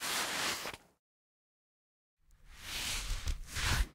hat take off put on
take, put, hat, off